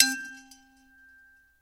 TeapotLid-pliers-medStrike-SM58-8inches
I struck the lid of a Lagostina M96A tea pot using 8-inch Channellock steel pliers. I sampled the strike twice, using medium and heavy speed.
Both samples were Normalized.
Audacity, pliers, Shure-SM58-mic, teapot-lid